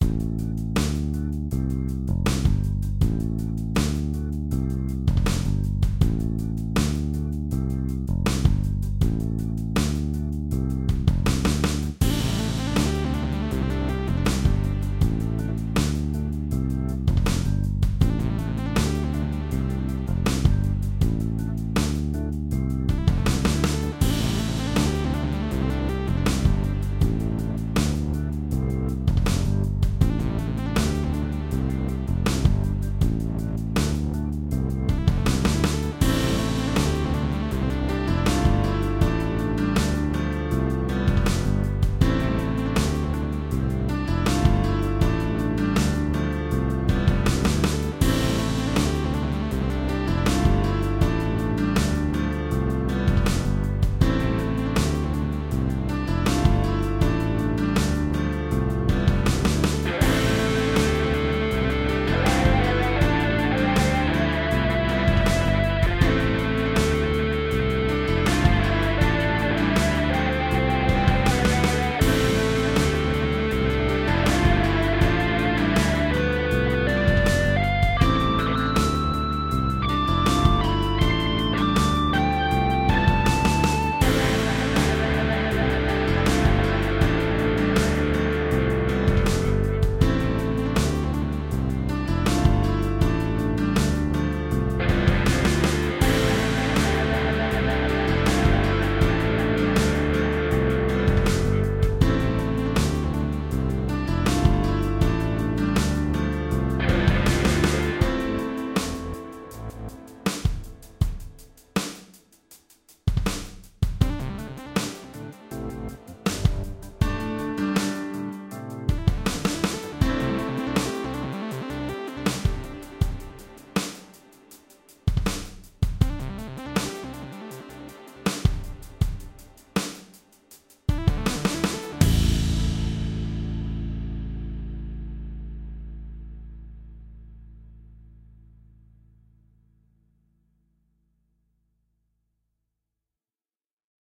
A rather bouncy instrumental. Good for news, science, documentaries, etc. Hope you like. Enjoy!